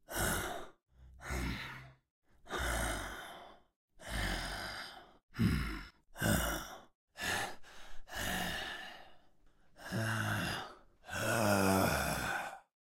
Raspy Gasps and Sighs
Gasps and sighs with a low raspy voice. Variations available.
Gasp, Gasping, Gasps, OWI, Raspy, Sighs, Voice